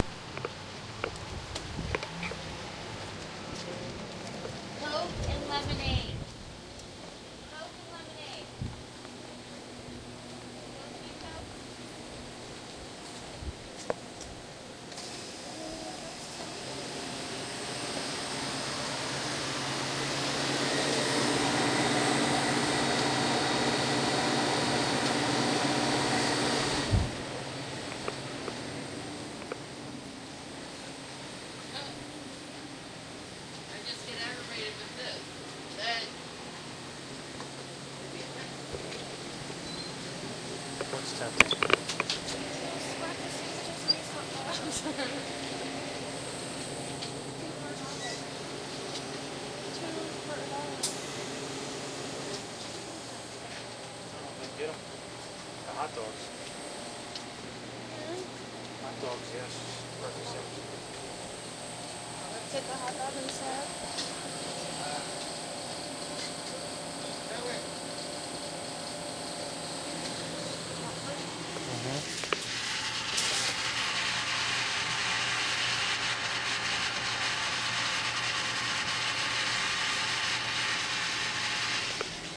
Slurpy machines, hot dog rollers, and occasionall sandy flip flop inside a convenience store next to the park at the beach with a DS-40 and a lust for sound.
interier, convenience, ambiance